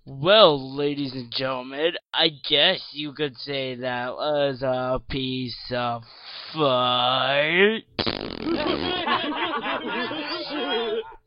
the stupid comedy show on 109.6 on your dial - piece of fart
NOTE: Not an actual radio show! (thank goodness)
All parts recorded with a headset.
DIALOGUE:
Radio Personality Mr. Jim: Well ladies and gentlemen, I guess you could say it was a piece of fart!
(cheesy fart noise made with my mouth)
Crowd: Hahahahahahahaha!
bad-pun, fart, funny, get-it, idiots, lame-disk-jockeys, lame-pun, laugh, laughing, losers, morons, not-good-humor, piece-of-fart, pun, stupid, the-stupid-comedy-show